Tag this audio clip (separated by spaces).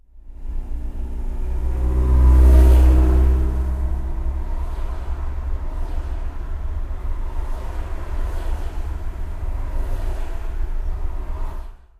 car engine field-recording nature street street-noise traffic truck